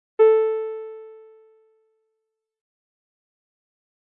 Synth Beep
Created with Arturia synth for classroom bell tone before intercom announcements in a school. Used in the film "the Gift".
Synth, bell